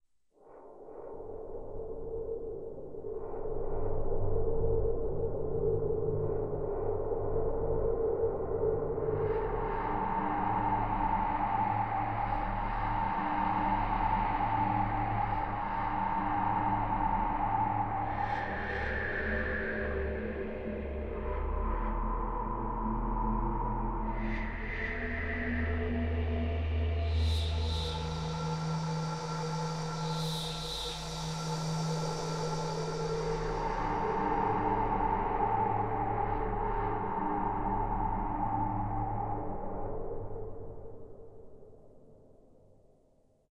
Short horror sound to add tension to a project. Created with a syntheziser and recorded with MagiX studio.
Like it?